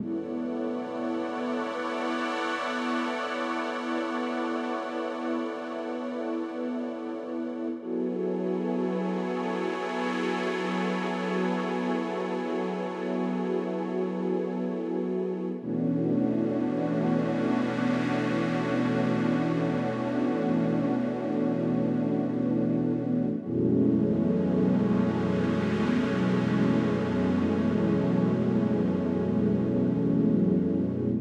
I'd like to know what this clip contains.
A warm and full pad. A complex chord transposed down 4 times.
123bpm
123bpm, 8bars, Blaze, Bright, Chord, Complex, Pad, Warm